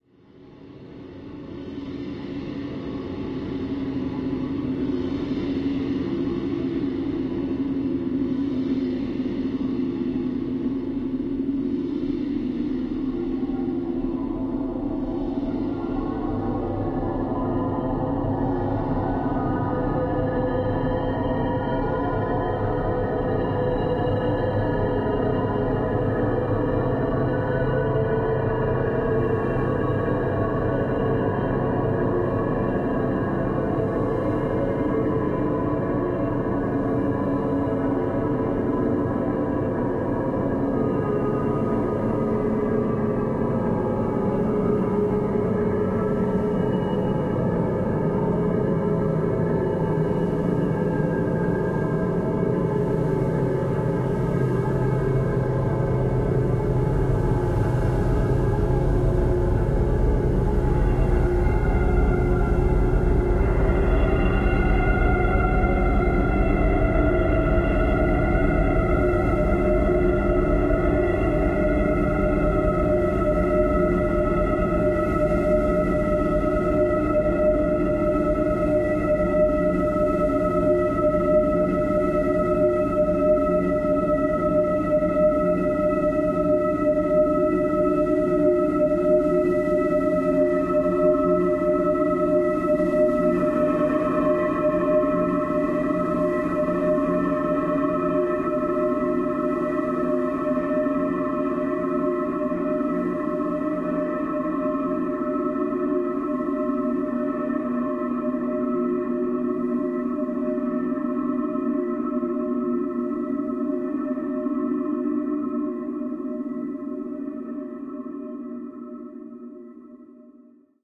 eerie sound
Eerie long evolving pad.
After all this transformations, it still has something "industrial" in it...
eerie, drone, industrial, long, evolving